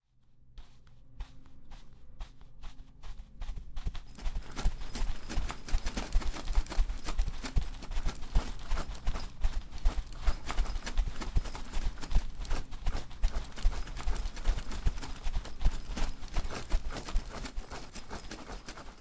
31 trote soldado
foley, soldados corriendo con equipamiento.